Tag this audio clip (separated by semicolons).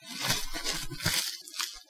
plastic; wrap; bubble; ruffle